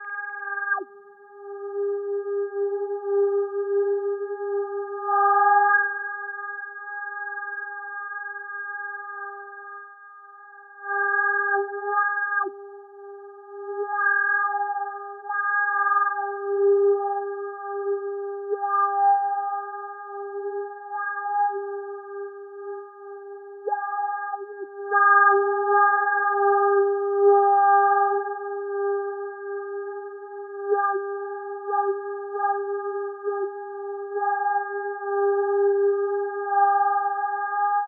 This is an (electronic) atmosphere processed in SuperCollider